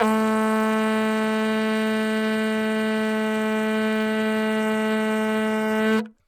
Single Vuvuzela blown in studio. Unprocessed.
africa, wm, football, soccer, vuvuzela, horns, south